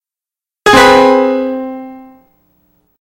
three tones of a Piano